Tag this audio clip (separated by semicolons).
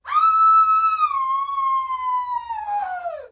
rage; scream; yell